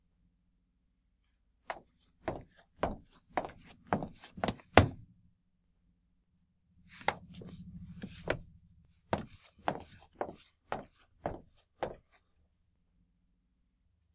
Footsteps - to and from mic
Created by walking on hardboard sheets laid on carpeted workshop floor.
Recorded using a Samson SAPS01 mic with AUDACITY software
Location: Dorset, UK
Feet, Foot, Footsteps, Walk